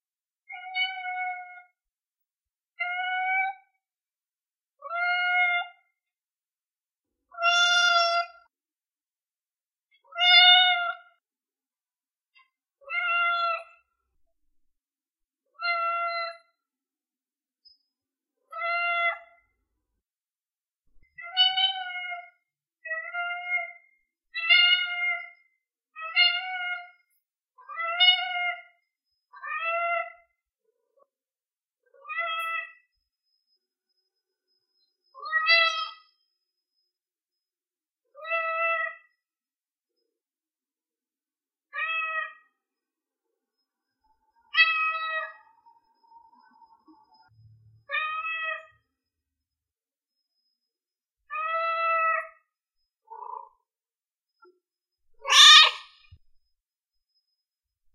Cat Mew Compilation
Little kitten asking for attention meowing
meow-compillation, meow, cat, purred, miados, gato